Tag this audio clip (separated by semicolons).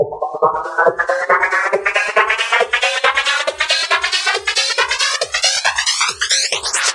138bpm; dance; loop; percussion; phasing; rising